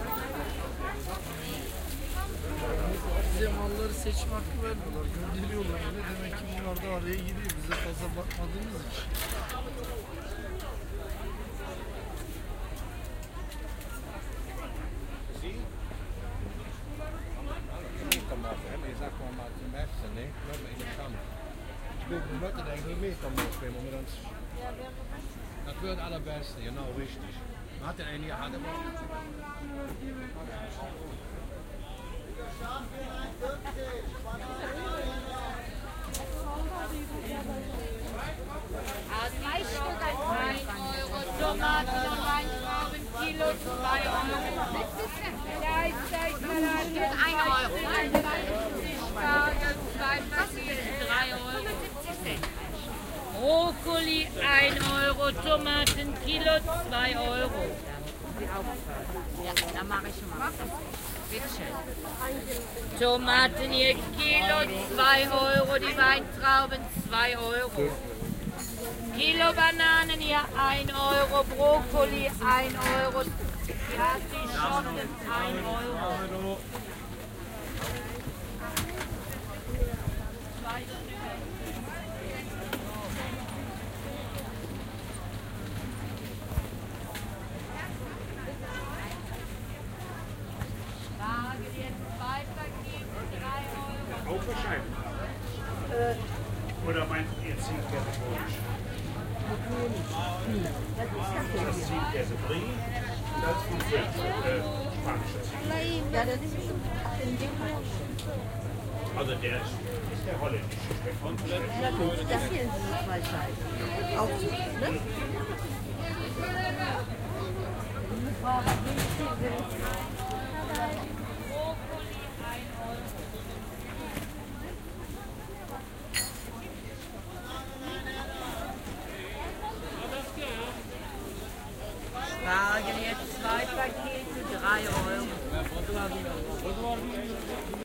market cologne 13 04 07
Walking across a greengrocer´s and farmer´s market in a suburb of Cologne, Germany. Sellers shouting the prices of goods. Customers walking around and talking, some of them in Turkish, some in the typical Cologne dialect. OKM Binaurals, OKM Preamp, Marantz PMD 751.